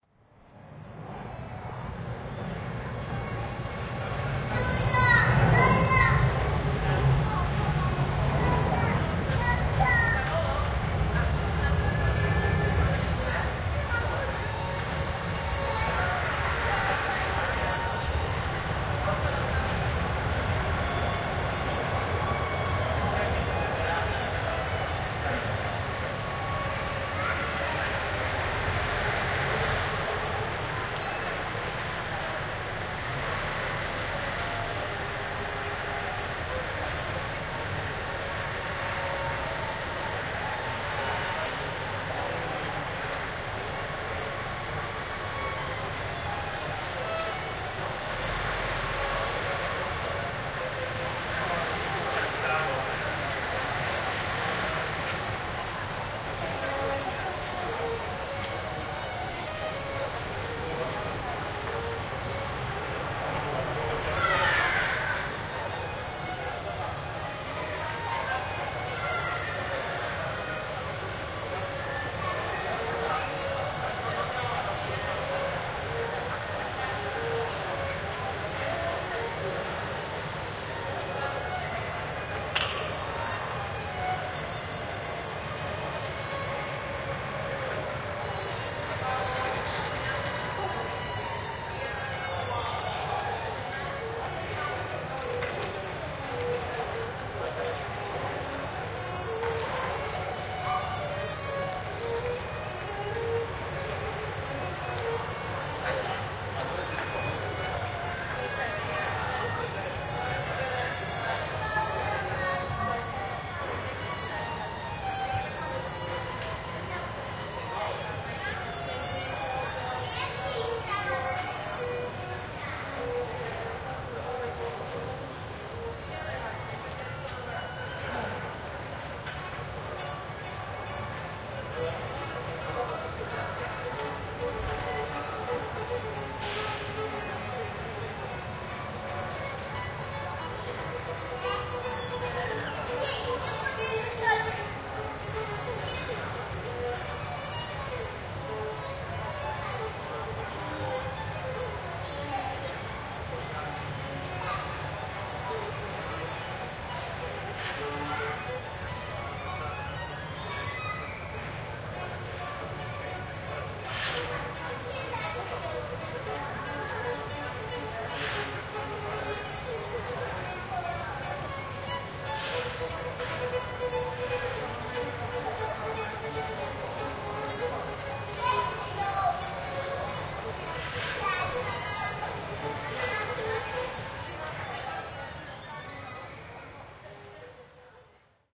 26.08.2016: Wrocławska street in Poznań (Poland) ambiance: overlapping sounds of street hubbub, tramways, walking people, cyclists and street musician (violinist).
Recorder: marantz pmd 660m + shure vp88/no processing
violin, Poland, street-musician, music, field-recording, ambience, street